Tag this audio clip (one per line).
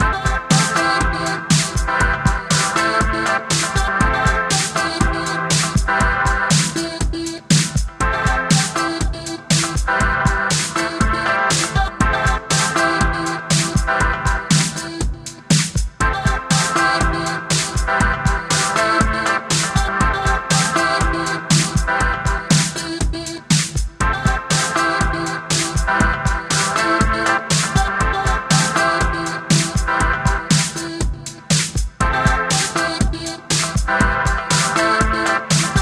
120bpm
Beach
Chill
Cinematic
Dance
Drum
Drums
EDM
Eletro
Film
FX
Happy
House
Mastered
Movie
Music
Party
Percussion
Soundtrack
Sun
Synth
Tropical